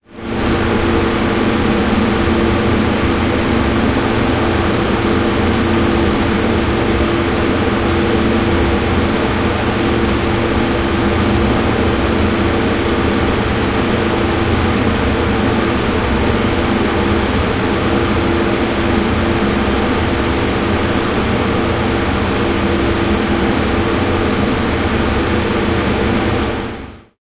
fan helsinki wanhaYOtalo
A fan high up in a staircase, "on the top of the city". Quite a massive sound. Field recording from Helsinki, Finland.
Check the Geotag!